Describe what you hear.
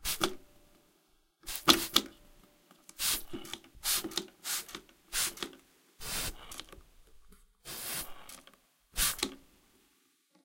Squirting from a spray bottle a few times
wet, bottle, window-cleaner, stereo, liquid, squirt, spray, spraybottle